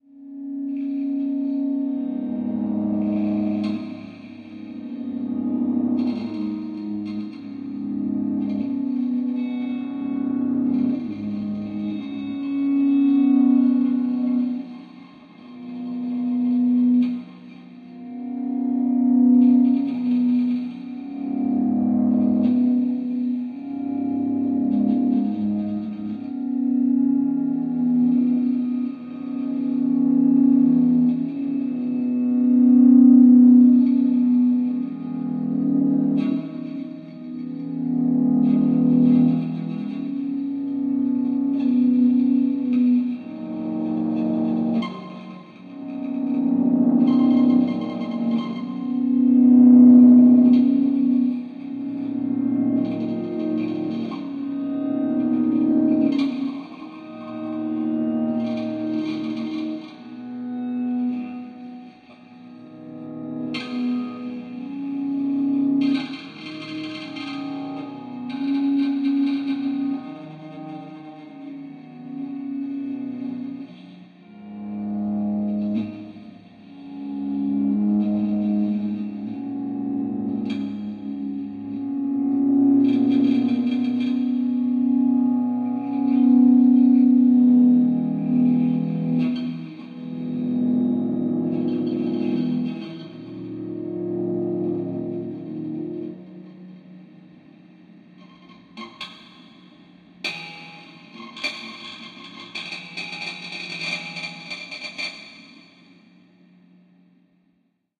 This is just a simple guitar-meets-audiomulch type of experiment. I setup various chains of granulations and delays and just started playing the acoustic guitar through them. Hopefully you find some nice chords in here to cut up and play with.